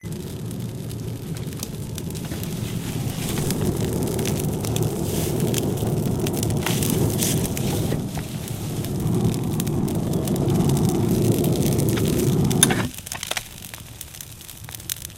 somerset fire 1
recording of a fireplace in somerset
fire, somerset, field-recording